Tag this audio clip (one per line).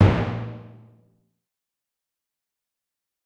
acoustic
drum
drums
dry
hit
HQ
one-shot
orchestra
orchestral
pauke
percs
percussion
percussive
stereo
timp
timpani